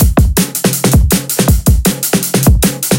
fast-break-beat-2 HardLimit
The original sample was recorded YSJ_Sounds:
I bit his efforts: expander/compression/saturation.
160-bpm, Acoustic, beat, break, breakbeat, drum, Drum-n-Bass, drums